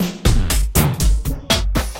All loops in this package 120 BPM DRUMLOOPS are 120 BPM 4/4 and 1 measure long. They were created using Kontakt 4 within Cubase 5 and the drumsamples for the 1000 drums package, supplied on a CDROM with an issue of Computer Music Magazine. Loop 50 has a very nice feel to it.
120BPM, drumloop, rhythmic